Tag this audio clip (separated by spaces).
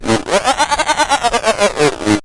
circuitry bend bent toy glitch circuit bending